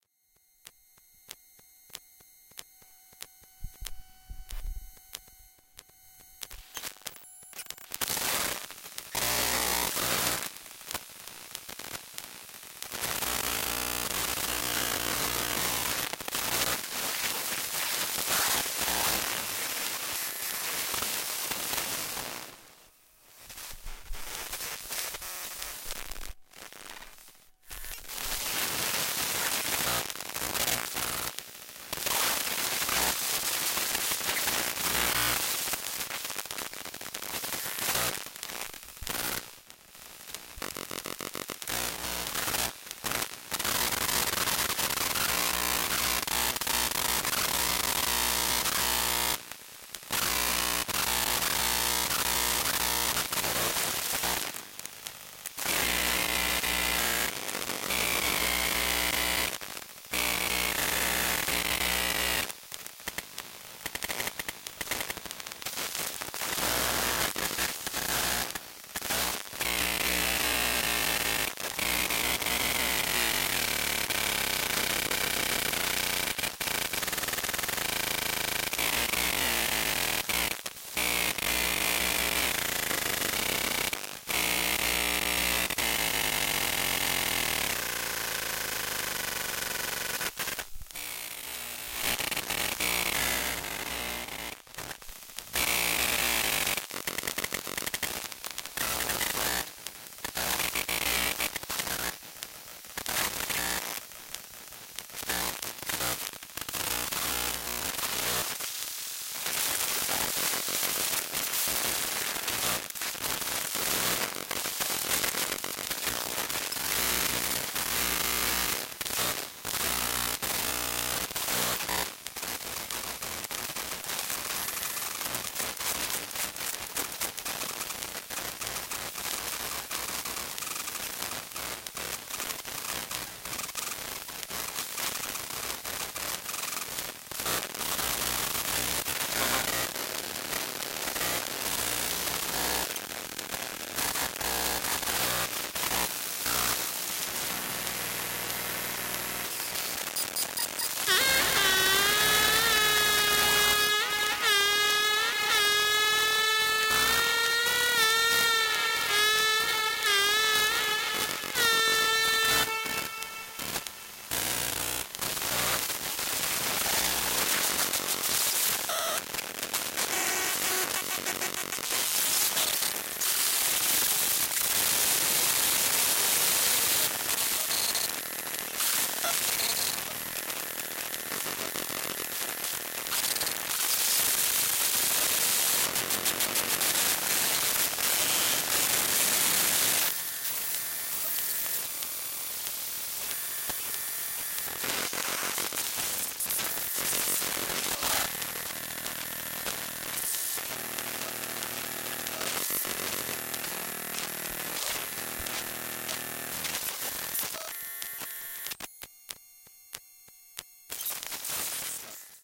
Might have to flick through to find some interesting bits. Can't remember what happened at 2:40 mins but it gives a weird sound so I left it. Recorded with a telephone pickup coil to get that electromagnetic sound.